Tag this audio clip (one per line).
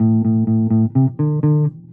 bass
sample